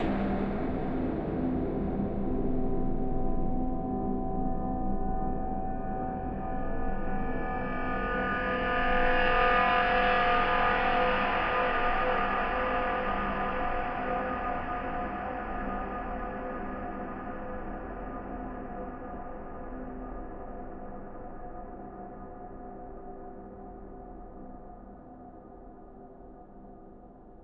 State of shock
A deep long stab with a really raw stinger sound after it. This stinger is one of the most infamous sounds heard in the last 25 years of TV, movie and drama. You can hear this sound, or parts of this sound in games like GoldenEye, artists like Depeche Mode and others has used the entire sound, or parts there of. This is an Omnisphere patch by me, and since I bought Omnisphere just recently I thought it would be a dumb idea not to use it. So all I want to say is: Have fun!
evil, mystic, stab